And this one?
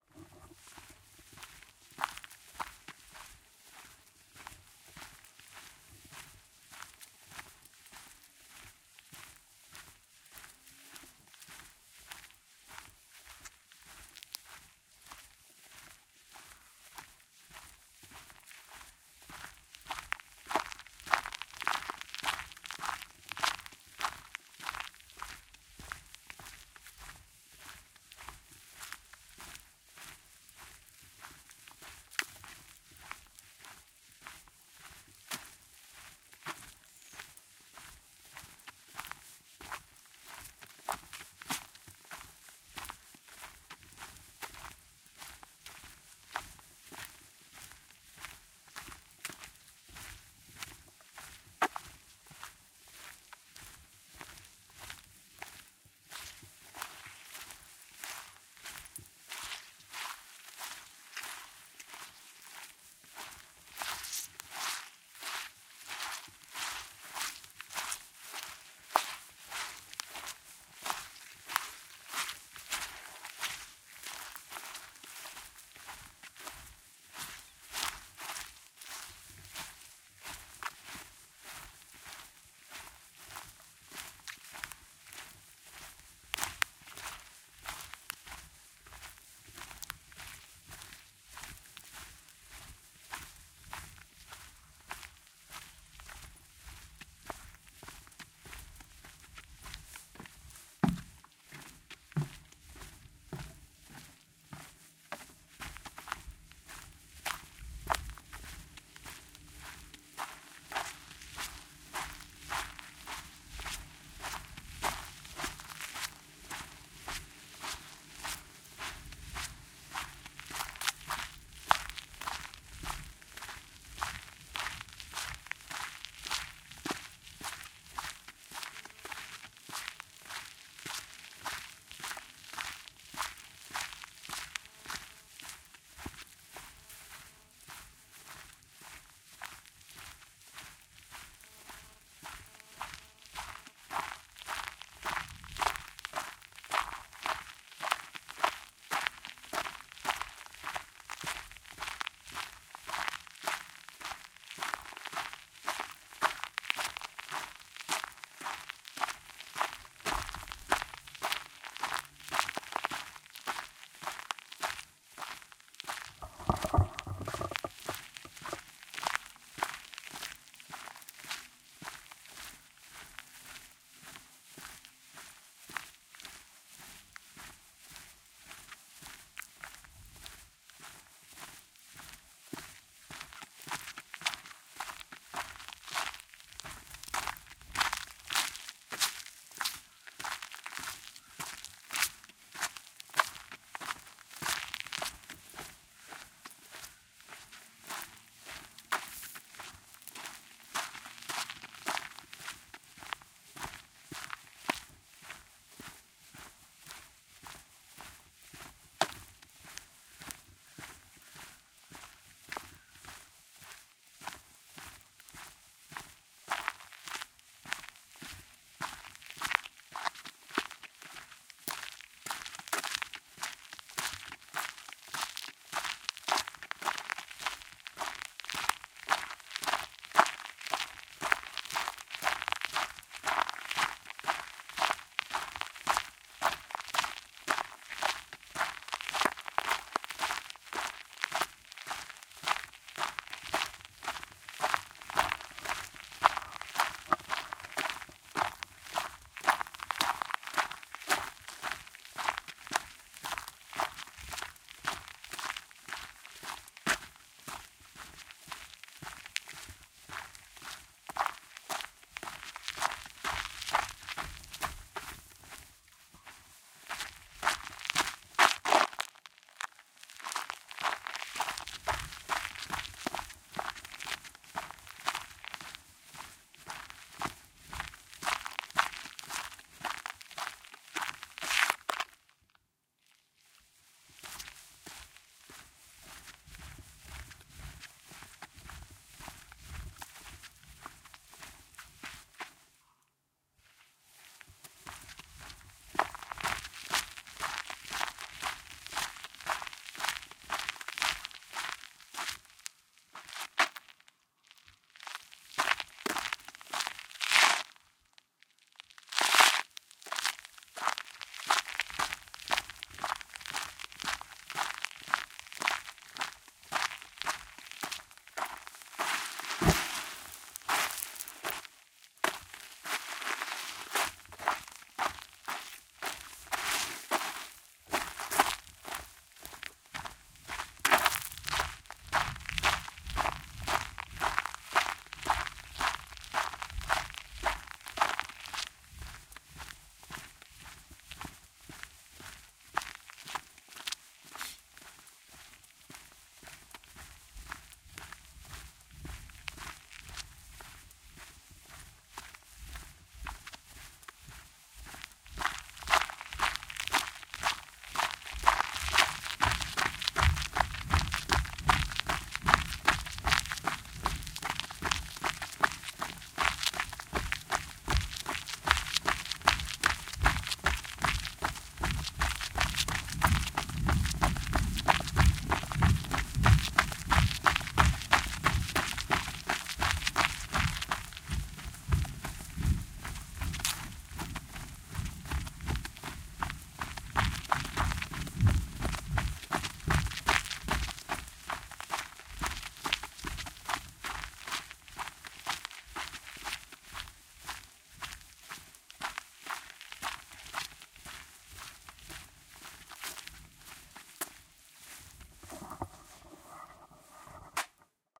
Walk on gravel, grass, wet grass and more. Short runs and start/stops as well.